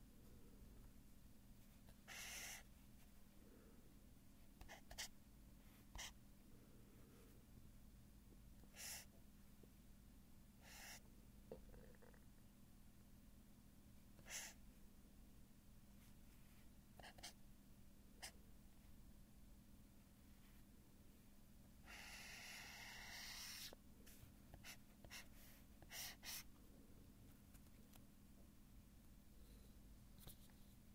Highlighting on paper. Recorded with a Neumann KMi 84 and a Fostex FR2.
drawing, marker, writing, highlighter, scribbling, paper